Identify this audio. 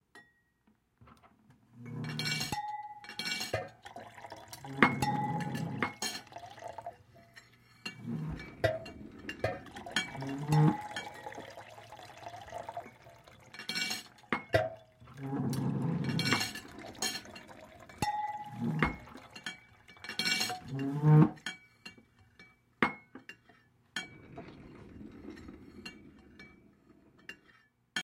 Sound scape with chairs, plates, glasses, pitches creating chaotic under tones of a bustling diner
Recordists Peter Brucker / recorded 4/16/2018 / condenser microphone / edited together in Logic Pro X